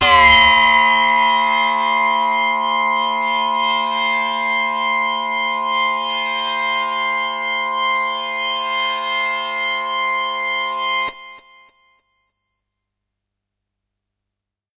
Leading Dirtyness - C6
This is a sample from my Q Rack hardware synth. It is part of the "Q multi 009: Leading Dirtyness" sample pack. The sound is on the key in the name of the file. A hard, harsh lead sound.
lead, multi-sample, electronic